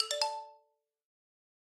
FX - Smartphone Ringtone (xylophone)
cell, effect, fx, phone, ring, ringtone, smartphone, sound, telephone, xylophone